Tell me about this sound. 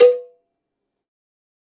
MEDIUM COWBELL OF GOD 046
cowbell, drum, god, kit, more, pack, real